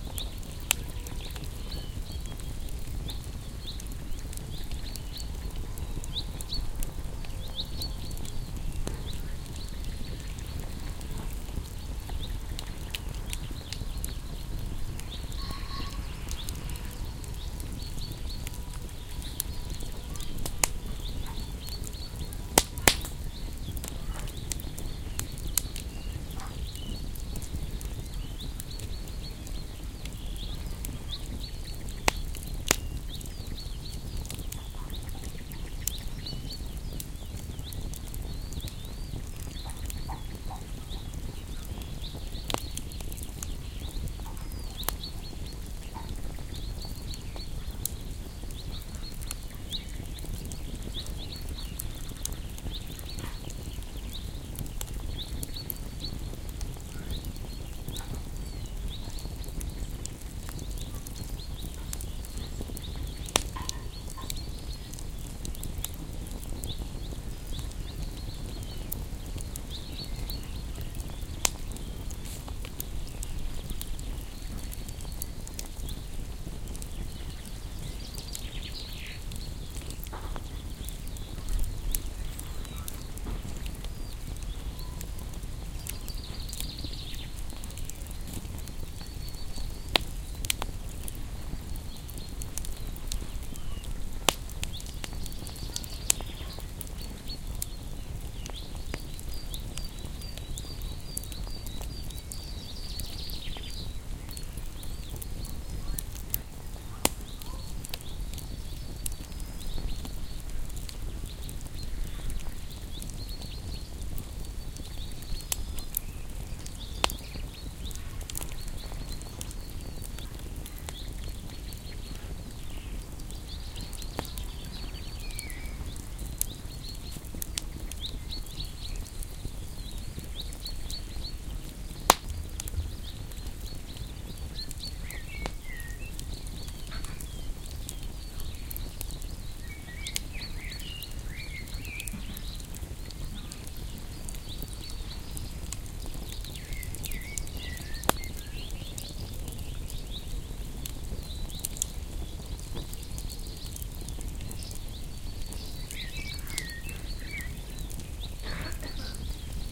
bonfire in garden
I recorded a bonfire in my garden, one quiet evening. In the background there's a lot of birdsong and maybe a little noise from the kitchen, inside the house if you listen carefully. At some point there's a dog laying down beside me, but it's hard to hear.
This was recorded with a Sony HI-MD walkman MZ-NH1 minidisc recorder and a pair of binaural microphones. Edited in Audacity 1.3.9
binaural, birds, bonfire, burning, fire, garden